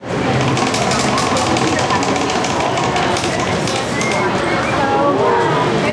Spin the wheel sound
Spin the wheel and pick your prize!
arcade, games, roulette, video-games